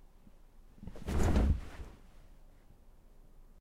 Another sound of jumping into bed